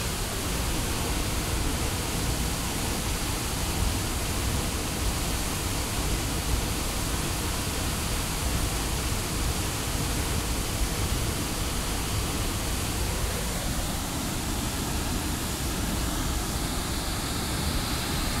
Sound of waterfall, recorded at top of cliff.
Recorded on iPhone 5s, with Rode App
Location: